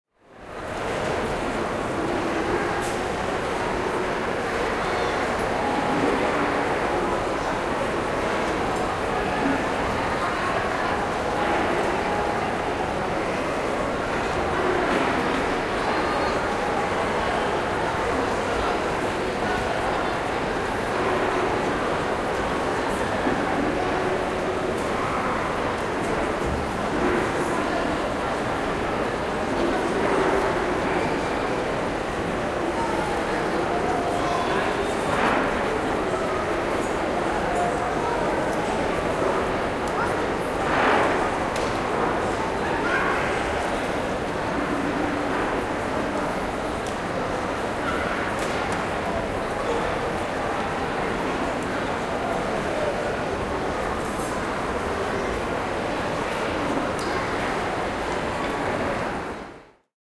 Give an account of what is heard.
Ambience, Food Court, B
Audio of a large shopping mall in Woking during the day. I was standing on the stairs leading to the 3rd level, pointing the recorder to the opposite side to grab the full sound of the room. An annoying squeak at 10kHz has been removed.
An example of how you might credit is by putting this in the description/credits:
The sound was recorded using a "Zoom H6 (MS) recorder" on 24th February 2018.
ambiance, ambiant, ambience, ambient, court, crowd, food, mall, people, shop